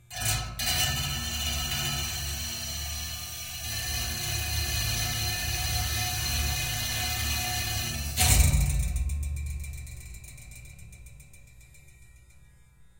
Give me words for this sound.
tail - tail
Electric shaver, metal bar, bass string and metal tank.
engine, metal, tank, shaver, processing, metallic, electric, Repeating, motor